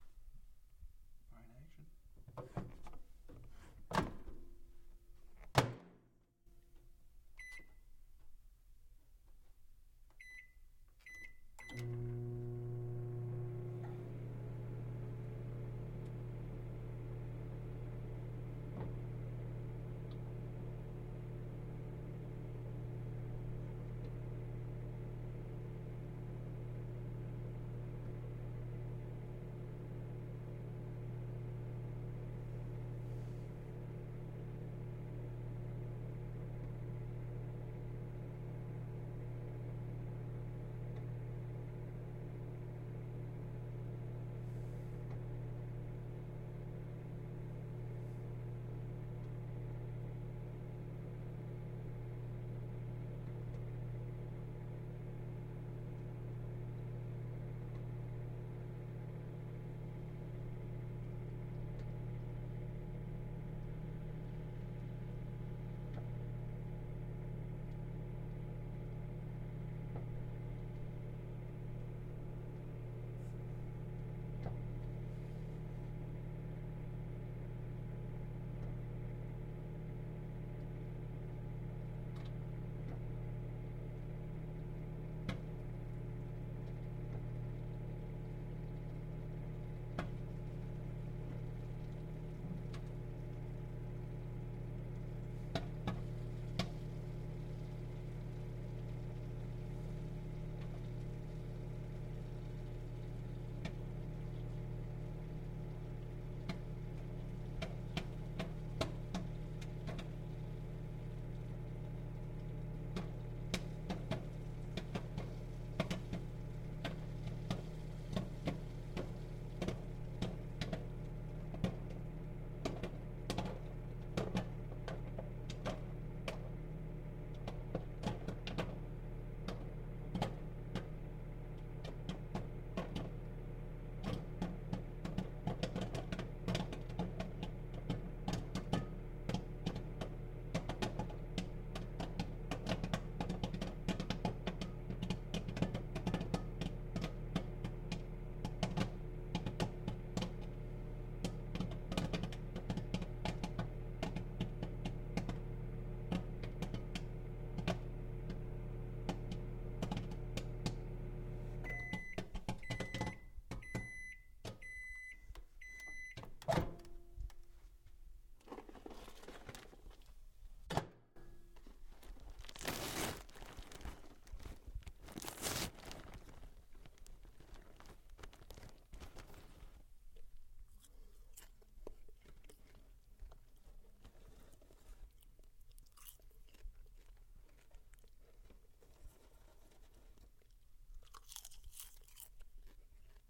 Popcorn popping in a microwave.